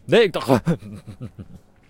LEE JH XX TI08 nee-hhmhmhmh
Sound collected in Leeuwarden as part of the Genetic Choir's Loop-Copy-Mutate project.
City, Leeuwarden, Time